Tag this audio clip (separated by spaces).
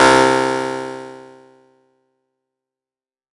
resonance synth 110 house hardcore club acid noise electro sci-fi electronic dub-step bounce synthesizer porn-core techno glitch-hop glitch effect processed bpm random dark sound dance trance blip lead rave